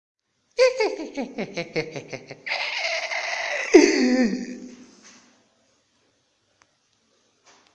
man
laughing
cartoon
animation
gigle funny laughter laugh